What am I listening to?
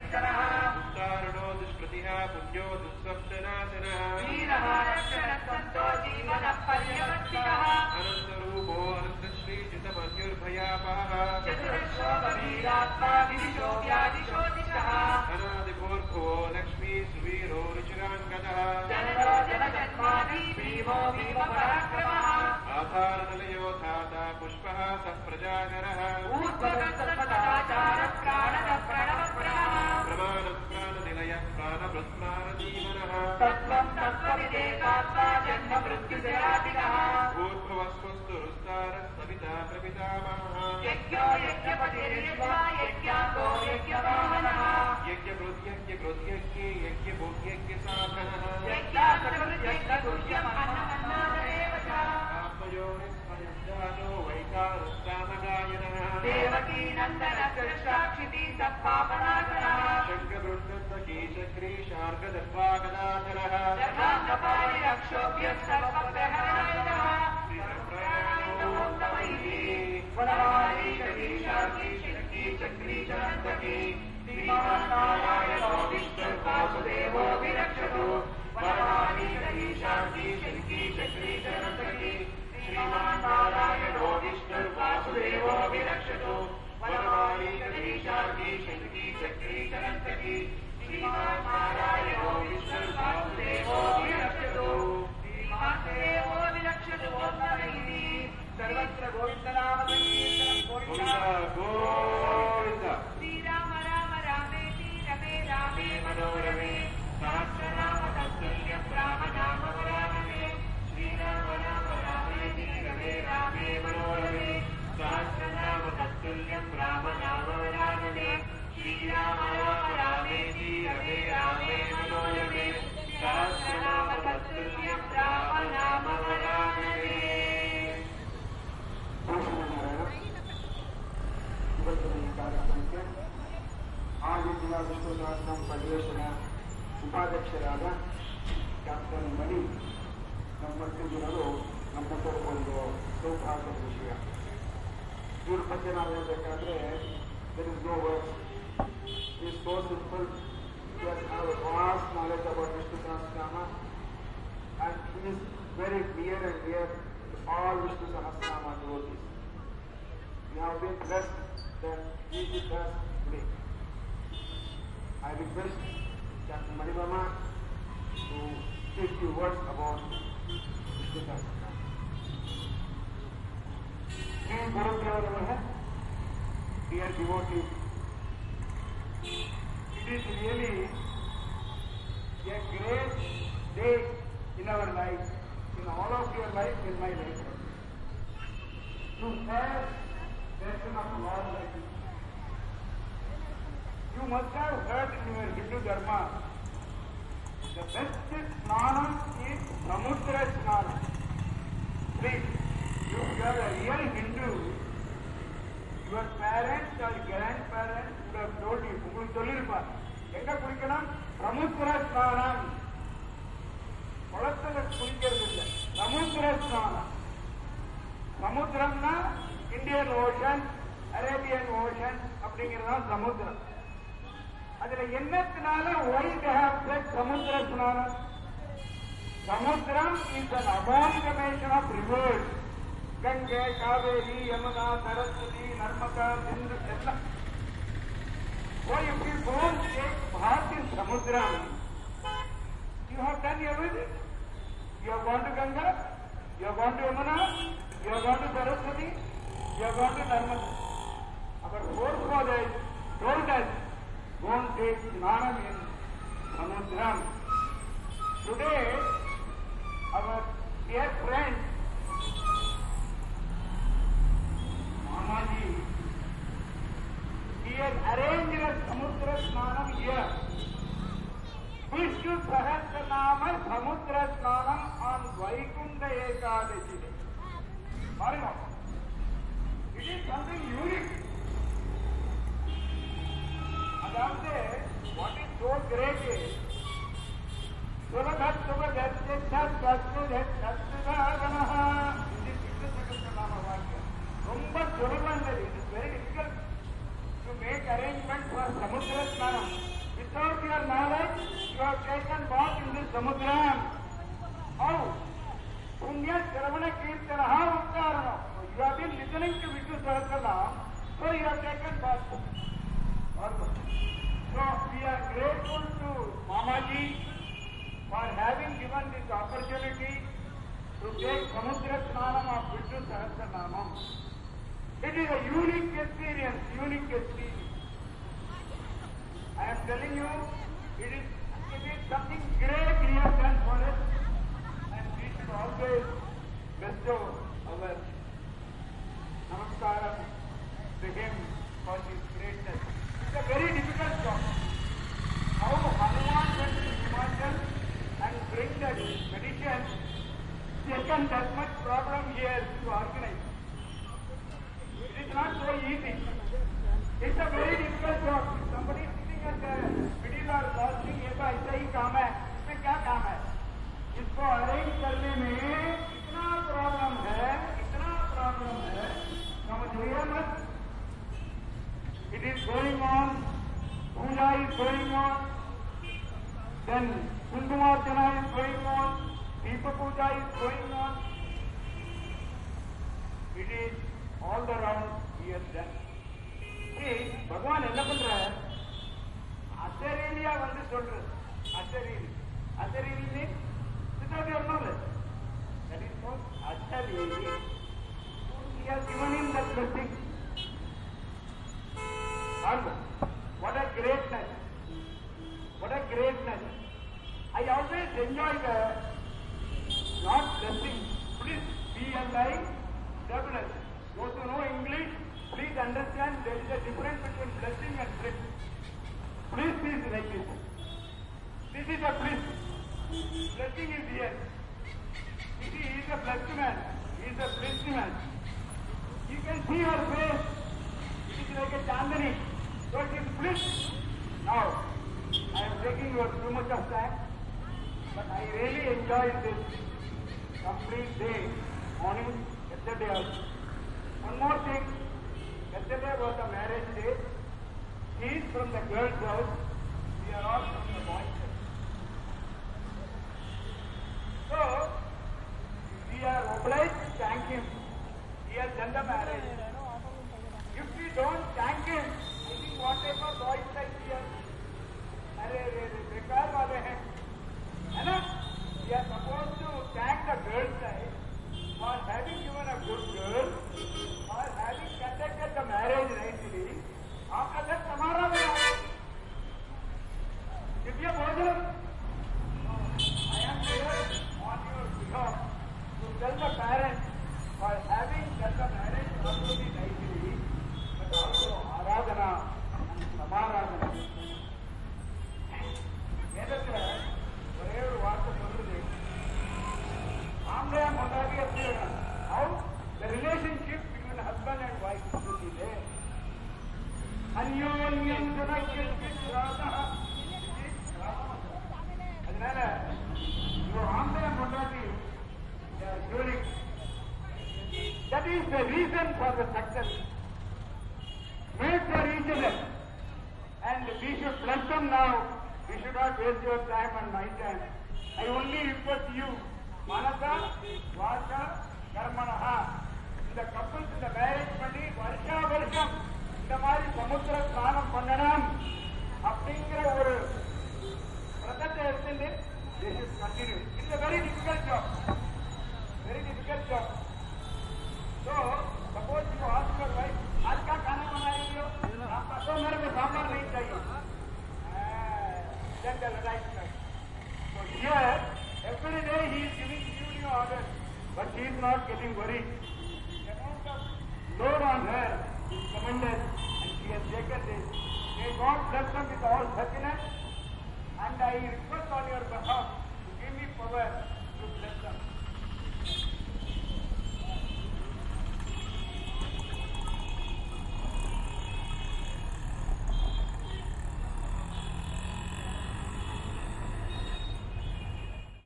Recorded in the evening at the corner of a street in Maleshwaram, Bangalore, India. Some sermon of a priest. but I do not understand the language